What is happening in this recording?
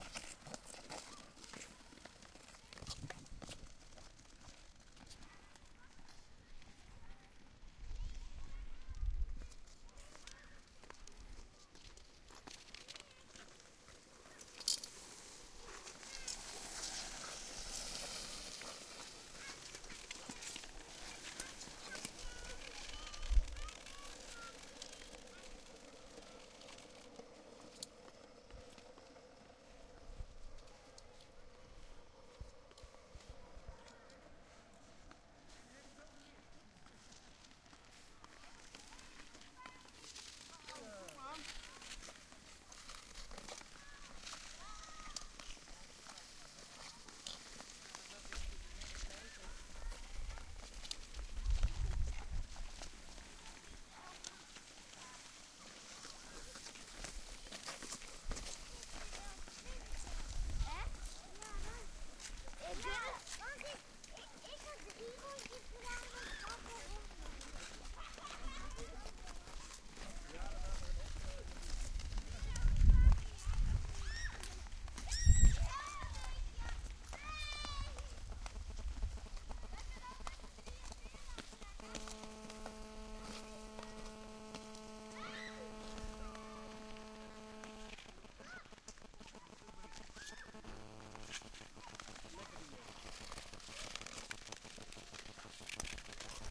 Ice rink skating in februari 2012 on a sunny day. Multiple recordings of skaters passing by. Unexperienced children as well as semi-pros can be heard passing by from right to left. recorded in Annen, the netherlands with a zoom H2 recorder